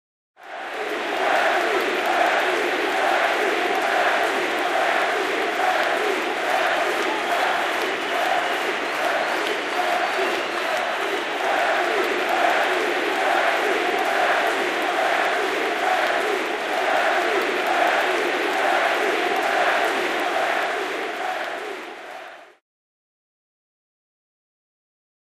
claque estadio
claque, andre, escola